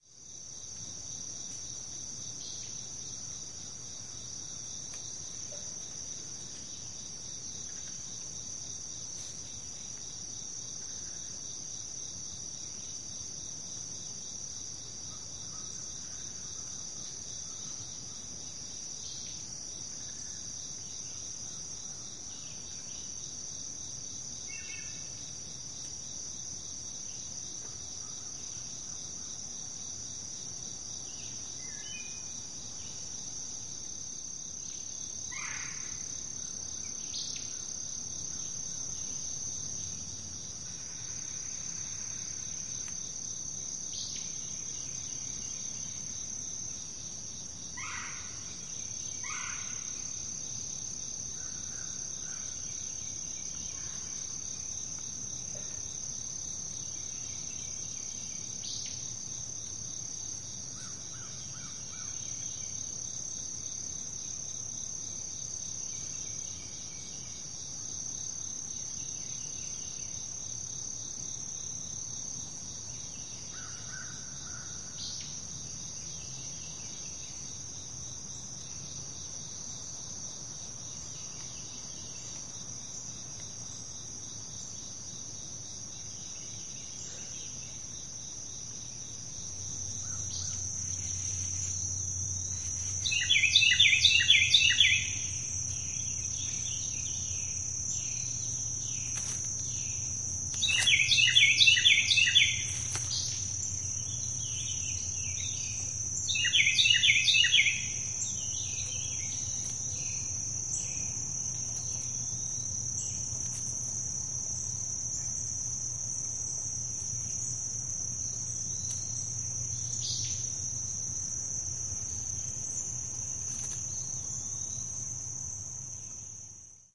DeepForest5AMJuly292012
A recording made in the deep forest featuring a continual chorus of crickets and other insects, birds soon begin to wake up. Enjoy